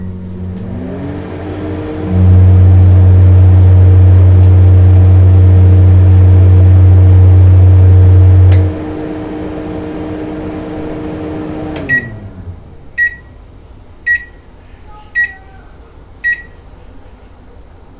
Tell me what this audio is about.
This is similar to the first microwave recording just a little closer but not much of a difference..